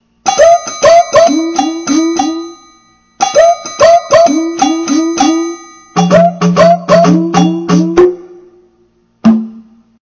A short clip from my Roland kit. Thanks. :^)